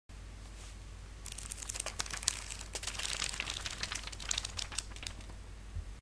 Pages Flipping
Me flipping through the pages of a large book.
Flapping, Flipping, Pages, Paper, Scrolling, Turning